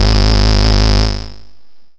Basic pulse wave 2 G#1
This sample is part of the "Basic pulse wave 2" sample pack. It is a
multisample to import into your favorite sampler. It is a basic pulse
waveform with a little LFO
on the pitch. The sound is very clear. In the sample pack there are 16
samples evenly spread across 5 octaves (C1 till C6). The note in the
sample name (C, E or G#) does indicate the pitch of the sound. The
sound was created with a Theremin emulation ensemble from the user
library of Reaktor. After that normalizing and fades were applied within Cubase SX.
reaktor, pulse, basic-waveform, multisample